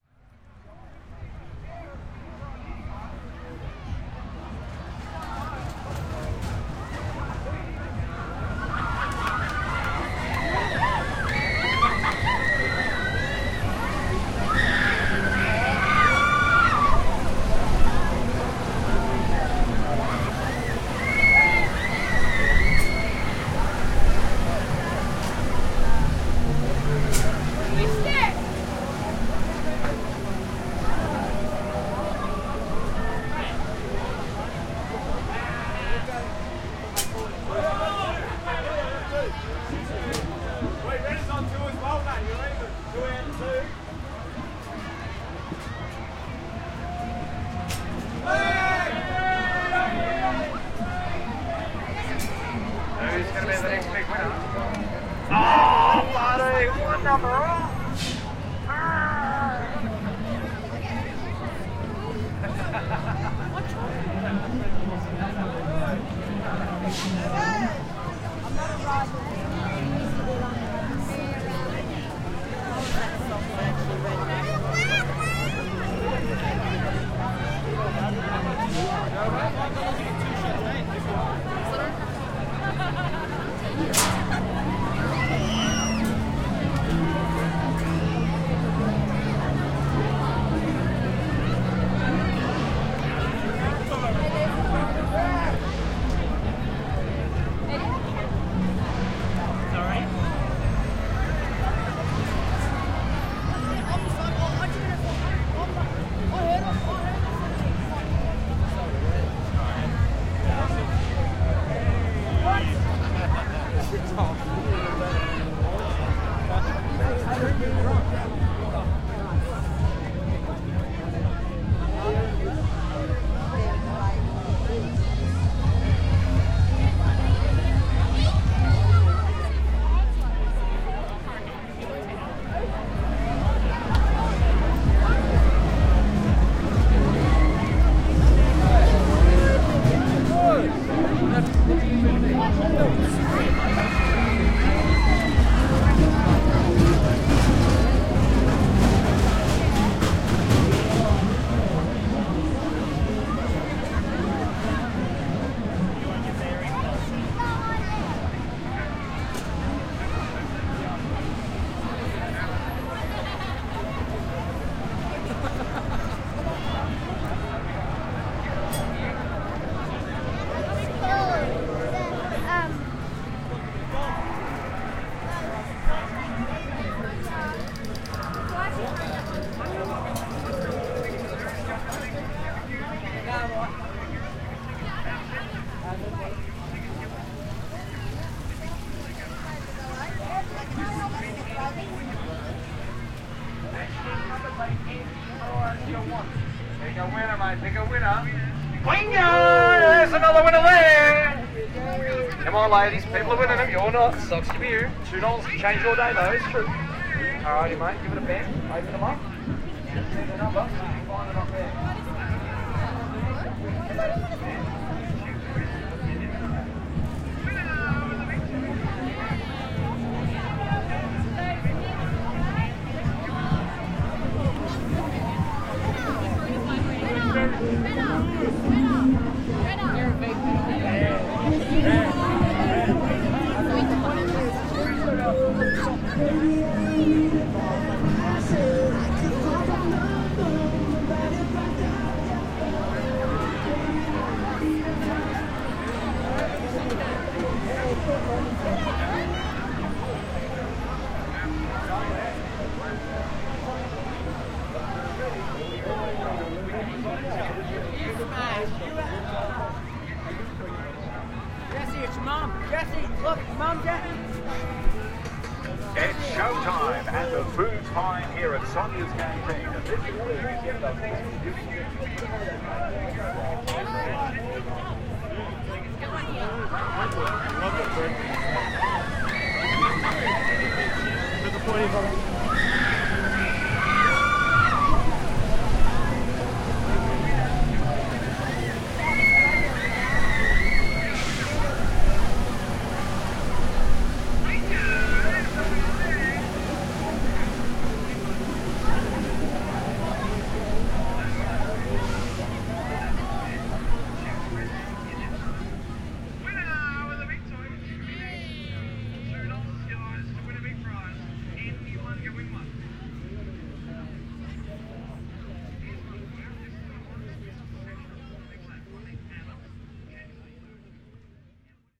Recorded one evening at the Castlemaine Agricultural Show 2013
Recorded using the Zoom H4. I did one distant atmos as a background layer, then recorded some up close perspectives walking through the crowd getting random snotches of sounds and conversation. Put it all together with Reaper.